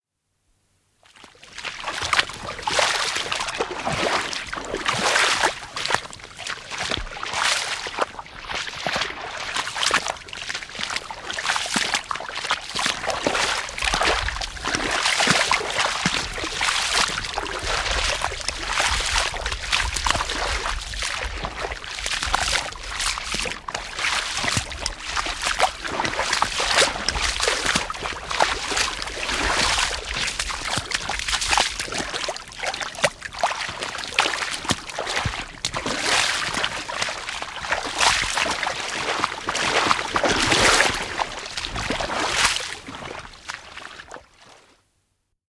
Askeleet vedessä / Footsteps, wading, walking in the water, puddle, slush
Kahlausta vedessä, lätäkössä, loskassa.
Paikka/Place: Suomi / Finland / Espoo, Luukki
Aika/Date: 22.04.1969
Tehosteet, Yleisradio, Vesi, Water, Footsteps, Slush, Suomi, Soundfx, Wet, Yle, Walk, Finnish-Broadcasting-Company, Askeleet, Finland, Kahlaus, Wading, Field-recording, Loska, Wade, Walking